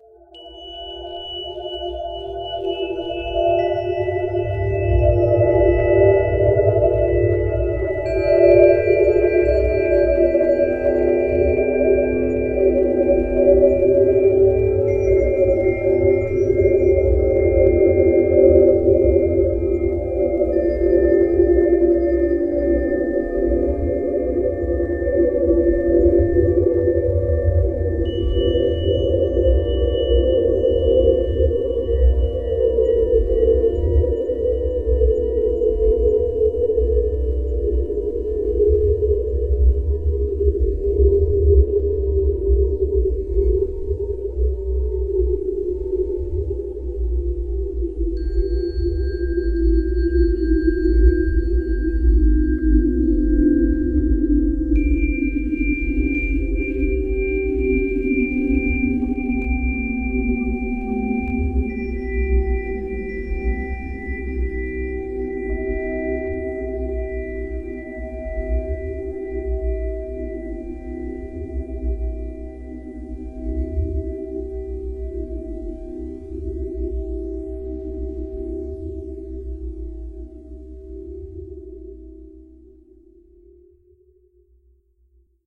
Celestial Journey
Alien, Ambient, Audio, Background, celestial, cinematic, Effect, Electronic, movie, Noise, project, Sci-Fi, Sound, space, Spooky, Strange, Synth, track, Weird